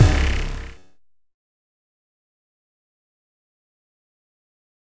- ALIEN KIT MODBD Alien 2
Here is my first drum kit pack with some alien/otherworldly bass drums. More sounds coming! Can use the samples wherever you like as long as I am credited!
Simply Sonic Studios